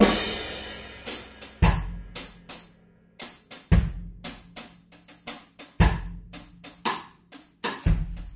Rock beat loop 10 - reggae backbeat
A slow reggae beat.
Recorded using a SONY condenser mic and an iRiver H340.
drum, loop, beat, reggae